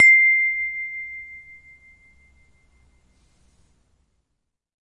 windchime tube sound